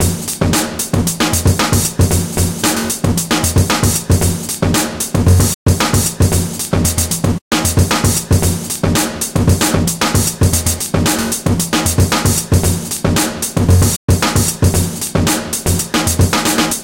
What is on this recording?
VST slicex : cut sample and reconstruct groove + vst db glitch
no effect.
drum, breakbeat, drums, groovy, loop, beat, beats, drumloop, old, break, drumloops, loops, school, breaks